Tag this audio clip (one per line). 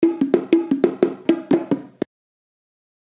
congatronics
samples
Unorthodox